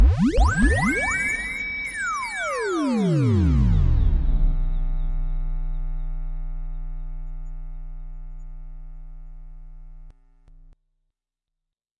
EFX sound created by Grokmusic on his Studios with Yamaha MX49
efx, effect, fx, lasser